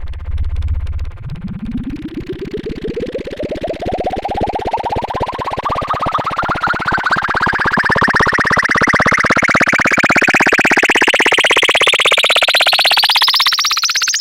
Analog bubbling with the filter going up, made with Waldorf Pulse routed through a Sherman Filterbank.